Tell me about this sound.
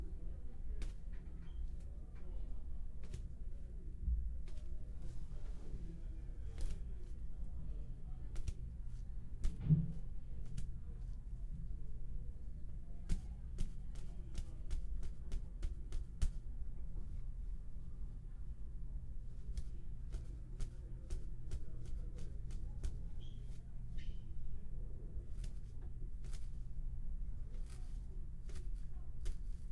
Kid walking on ceramic